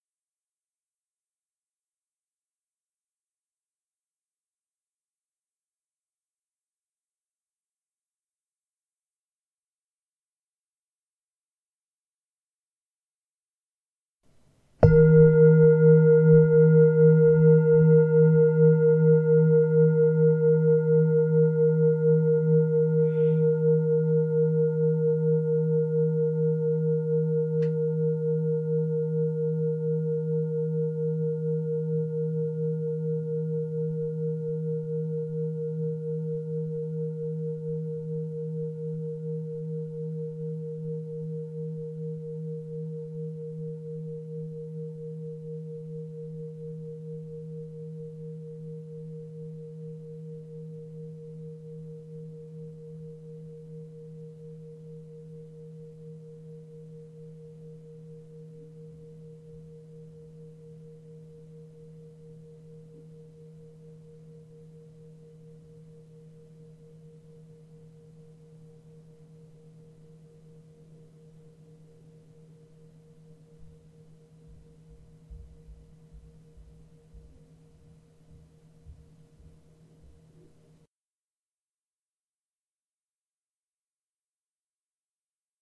Tibetan singing bowl
bowl, singing, Tibetan, Tibetan-singing-bowl